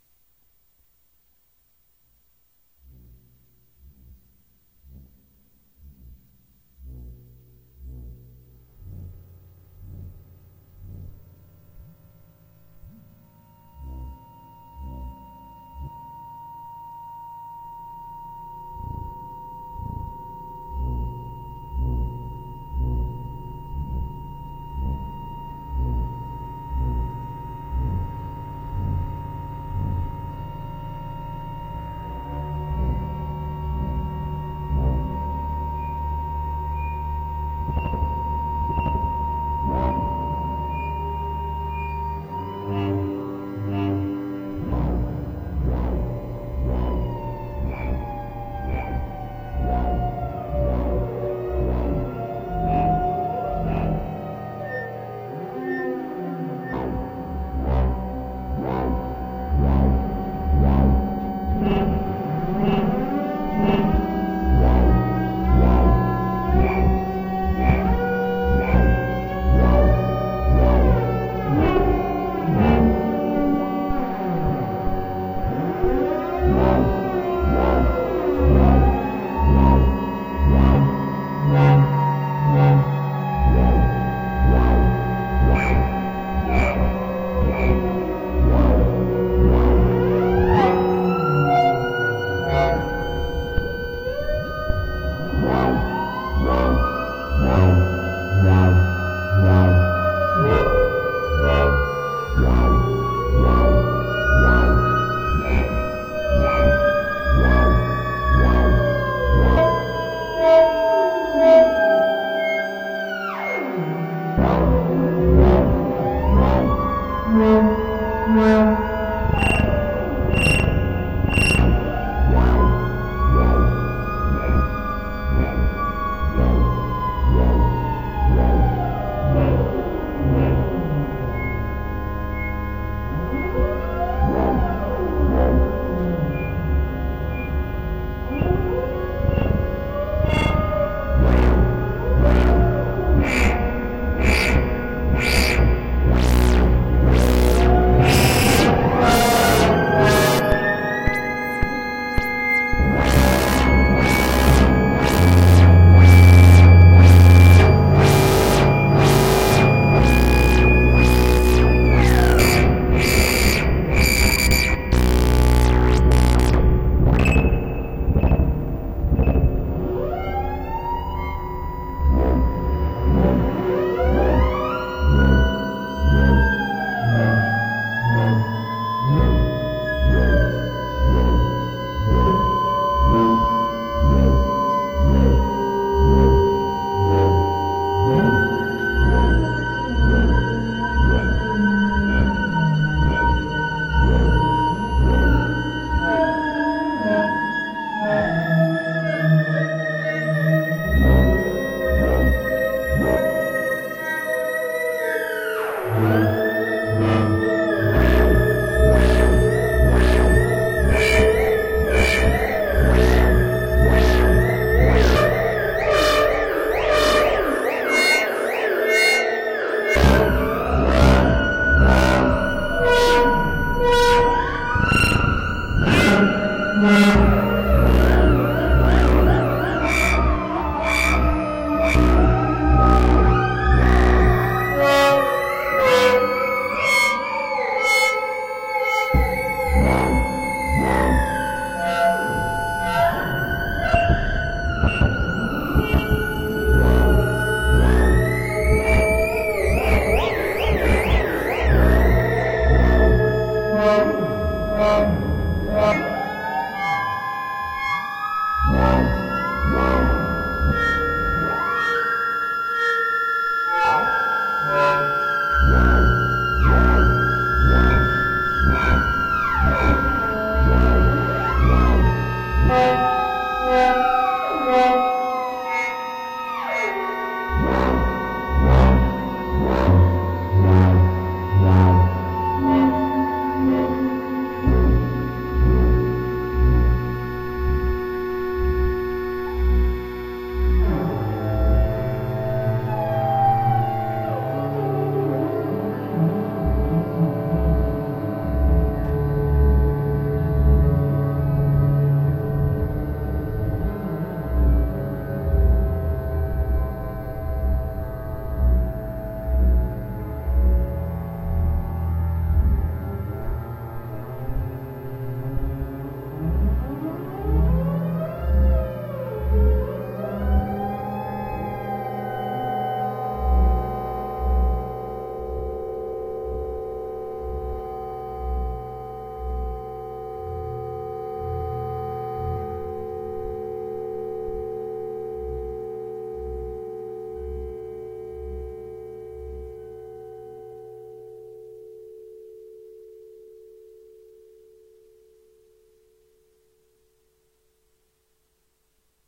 Alarm piece - modular patch, 04.04.2015
Patch on my Doepfer A-100 modular synthesizer.
Cut and normalized using ocenaudio.
It's always nice to hear what projects you use these sounds for.
You can also check out my pond5 profile. Perhaps you find something you like there.